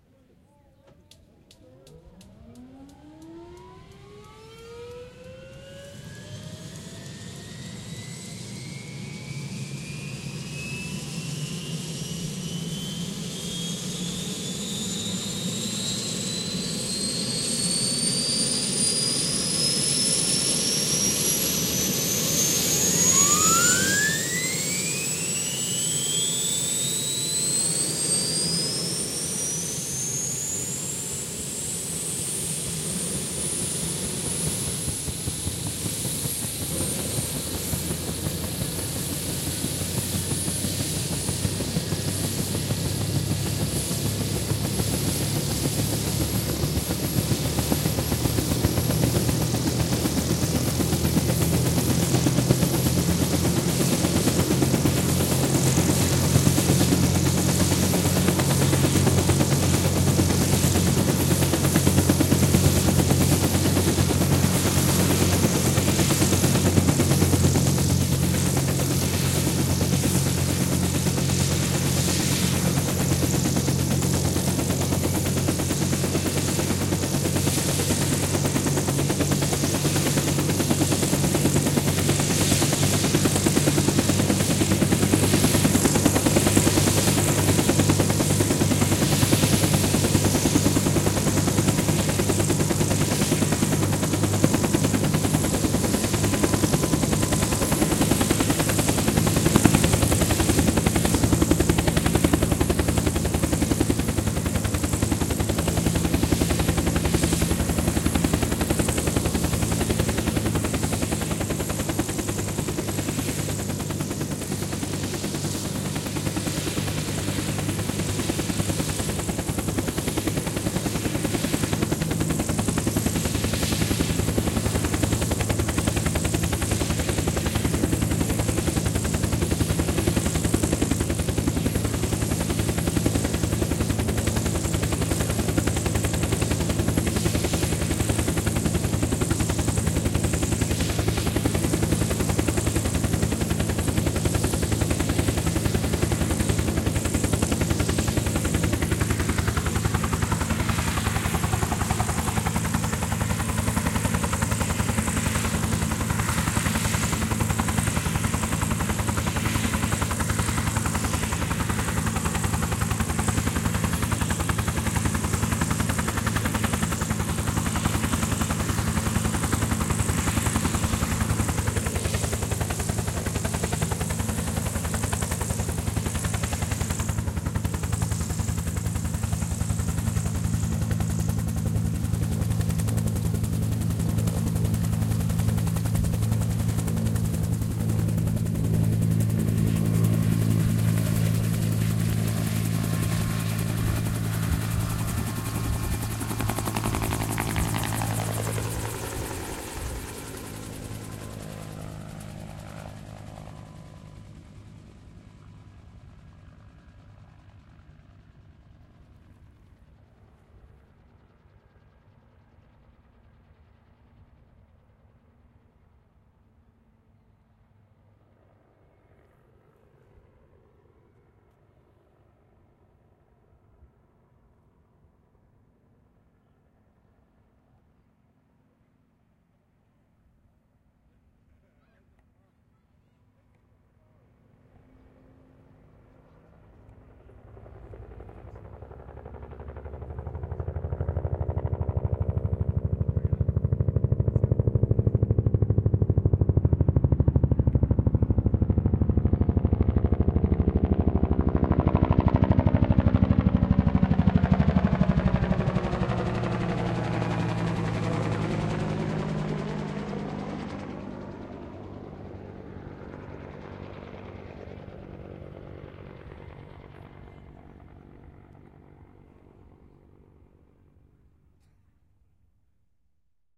UH-1 "Huey" Iroquois Helicopter
Ignition, start, lift-off, departs, returns for fly-by.
Recorded At the Copthorne Kings Hotel, Sunday June 3 2012, after the Vietnam Veterans Reunion Service at Waitangi.
"Whenever we heard that sound, it meant 'going home'."
Stereo record @ 44k1Hz XY coincident pair.
Huey, chopper, helicopter, UH-1, Iroquois, HU-1